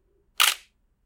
Nikon D800 single shot a
Single shoot of a Nikon D800 in 1/125 shutter speed